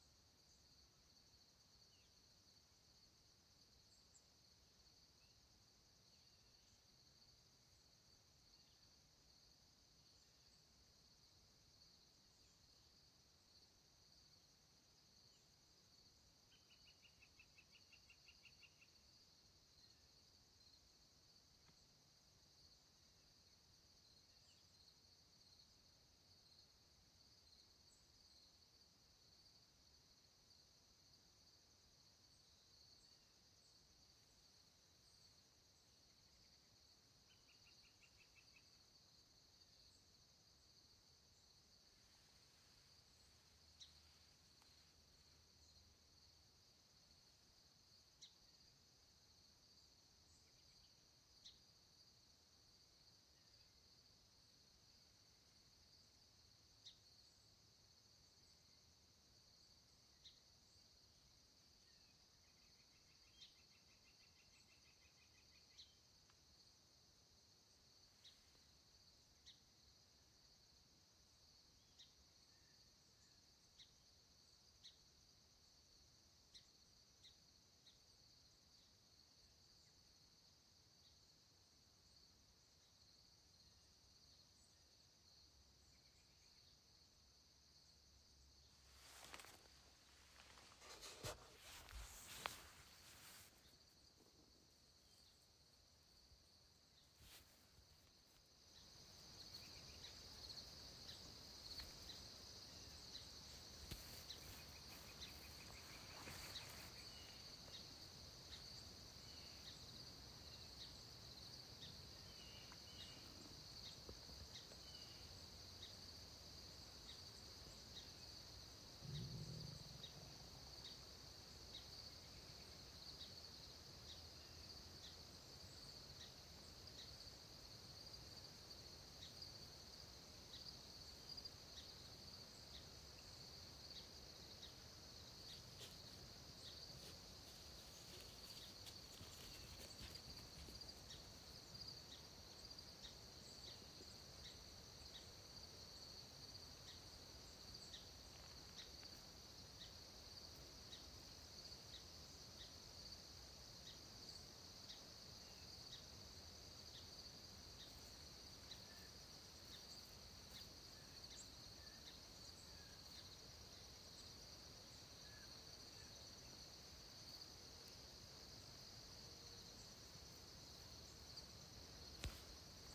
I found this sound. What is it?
Rural Vermont Morning
Taken using Voice Record Pro on an iPhone 11 Pro. Early morning, late summer day in a bucolic Vermont countryside.
ambience, ambient, field, meadow, morning, quiet, recording, soundscape, still